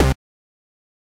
Synth Bass 018
A collection of Samples, sampled from the Nord Lead.
nord, synth, lead, bass